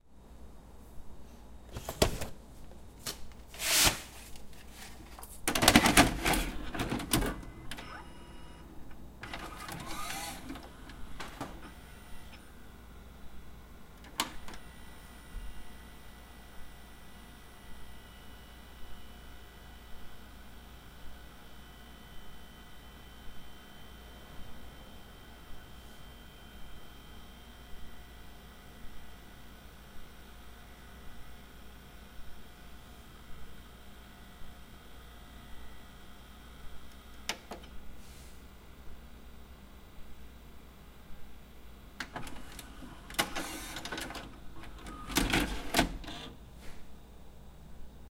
Putting a tape in my VCR and letting it play, then ejecting it.Recorded with the built-in mics on my Zoom H4.
request, tape, vcr